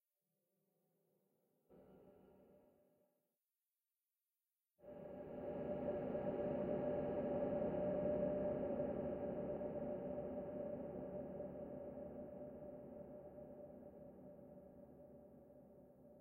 Surround dronetail -02
Darkscape with a lot of suspense. This is a sample from the "Surrounded
by drones pack 1" sample pack. It is a sample recorded as 5.1 surround
It was created within Cubase SX.
I took a short sample from a soundscape created with Metaphysical
Function, an ensemble from the Electronic Instruments Vol. 2 from
Native Instruments, and drove it through several reverb processors (two SIR's using impulses from Spirit Canyon Audio and a Classic Reverb
from my TC Electronic Powercore Firewire). The result of this was
panned in surround in a way that the sound start at the center speaker.
From there the sound evolves to the back (surround) speakers. And
finally the tail moves slowly to the left and right front speakers.
There is no sound for the subwoofer. To complete the process the
samples was faded at the end and dithered down to 16 bit.
ambient, deep-space, drone, space, surround